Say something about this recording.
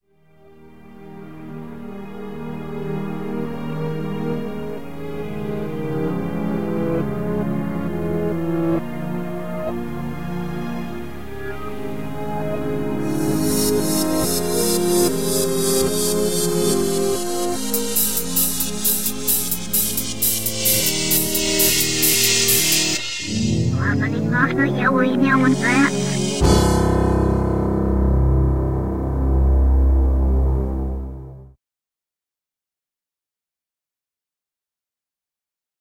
A short intro piece
Ambiance, atmosphere, intro, music, scripture, stab, synth, trippy